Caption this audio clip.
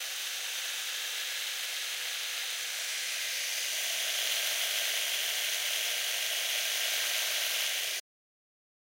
Cutter Trap
This is a spinning blade trap. I made this sound by recording a electric razor.